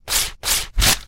Knife opening a plastic bag
plastic, opening, bag, knife